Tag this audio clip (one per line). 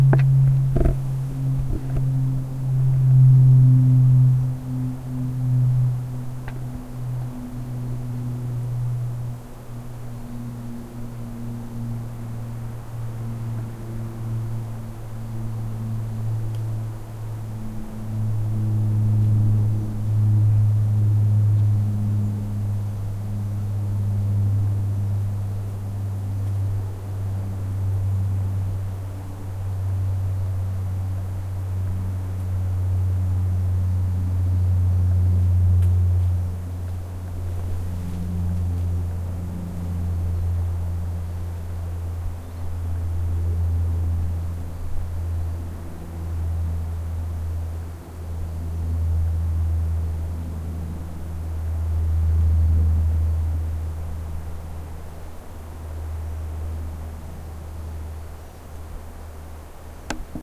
ambience
birds
field-recording
flying
forest
high
plane
sky
wind
woods